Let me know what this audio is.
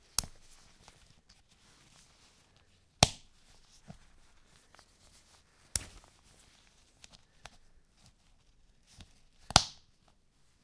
Snap-fasteners16
Here I tried to collect all the snap fasteners that I found at home. Most of them on jackets, one handbag with jangling balls and some snow pants.
botton, click, clothing-and-accessories, snap-fasteners